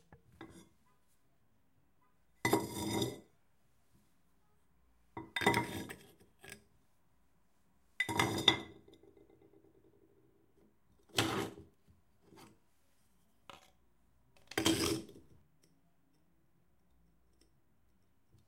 Pots 5-shoved dry
Set of 5 crockery planter pots shoved along cement. Dry recording. Foley uses.
dry, garden, crockery, foley, pots, crash